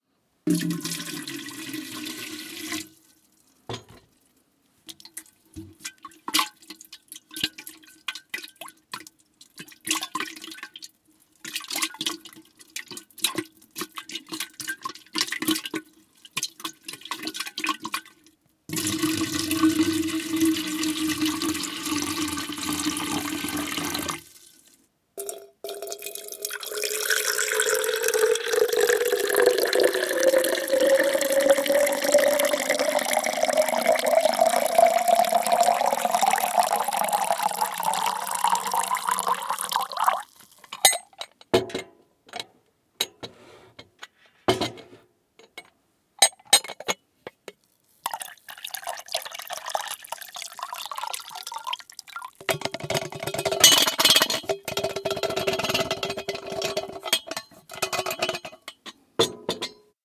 Pouring water from jug
drip, dripping, drop, drops, giessen, giesskanne, jug, kanne, liquid, por-out, pour, pouring, rain, raining, shower, splash, wasser, water, watering-can, wet